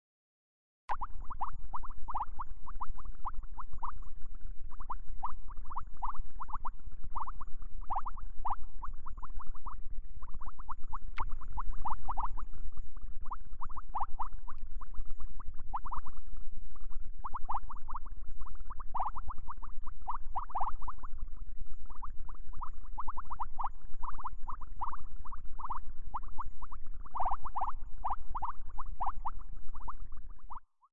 A series of sounds made using the wonderful filters from FabFilter Twin 2 and which I have layered and put together using Audicity. These samples remind me of deep bubbling water or simmering food cooking away in a pot or when as a kid blowing air into your drink through a straw and getting told off by your parents for making inappropriate noises. I have uploaded the different files for these and even the layered sample. I hope you like.
Boiling, Water, Bubbling, Bubble-sound-effect, Cooking-pot, Bubbles, Cooking, Deep-Bubbling-water